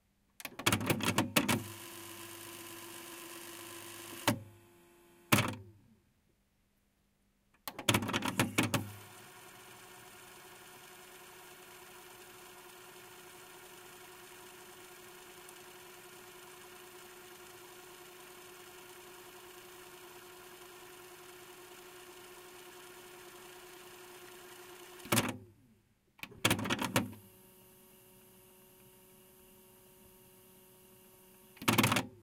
Automatic tapedeck rewind, fastforward, play
Electromechanical sounds of an automatic audio tape deck being rewound, fastforwarded and played briefly. This deck has digital controls that engage the play head and automatically stop at the end of the tape.
cassette, electric, electrical, machine, mechanical, motor, playback, solenoid, tape, whir